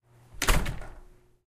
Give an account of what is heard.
door close
A simple home door closing, recorded from inside. Some might call this a slam.
close, closing, door, home, household, lock, shut, slam, slamming